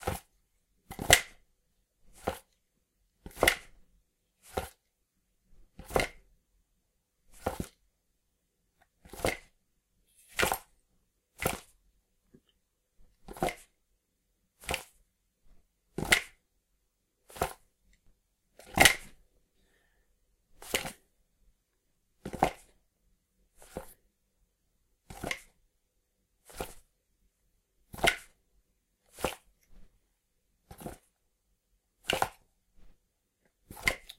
revolver holster 01
Holstering and unholstering a Colt 45 replica in a heavy leather holster.
colt, gun, holster, revolver, unholster